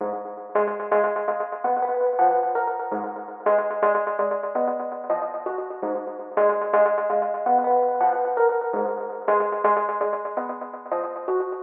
165 bpm - Broken Beat - E Piano
This is a small Construction kit - Lightly processed for control and use ... It´s based on these Broken Beat Sounds and Trip Hop Flavour - and a bit Jazzy from the choosen instruments ... 165 bpm - The Drumsamples are from a Roality free Libary ...
Beat, Broken, Construction, E, Kit, Loop, Piano